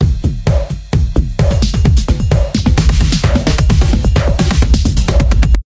hip hop 18
sample lop sound
beat, dance, disko, Dj, hip, hop, lied, loop, rap, RB, sample, song, sound